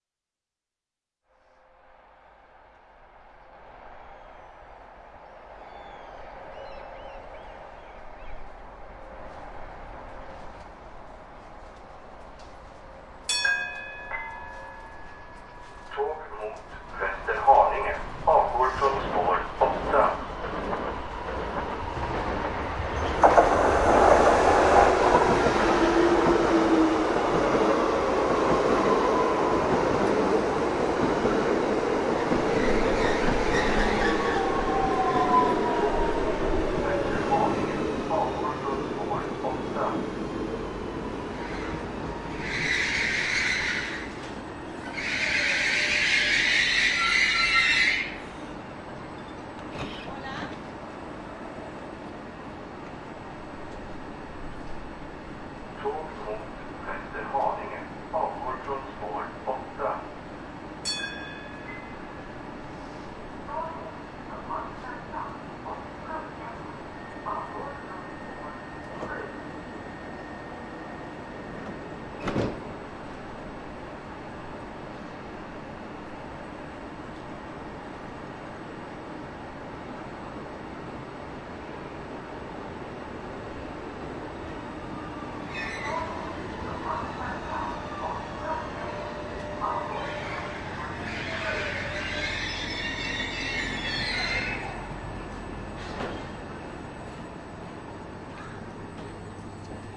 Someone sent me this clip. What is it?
Train Stockholm Pendeltag 01
Commuter train platform at Årstaberg, Sweden, just south of Stockholm. Recorded June 9, 2010 (CET, but recorder timestamp is US PDT GMT+8) on Sony PCM-D50 using built-in mics; this time with the windscreen; normalized in Audacity. Announcements, seagulls, two trains arriving, one phone call.
alvsjo, arstaberg, field-recording, media, sample, seagulls, sony-pcm-d50, stockholm, sweden, train, wikiGong, wind